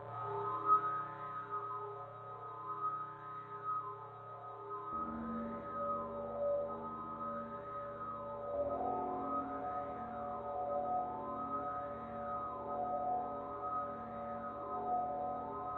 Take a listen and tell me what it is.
really scary
I wanted a scary preset for my synth since I was like 6. So here it is.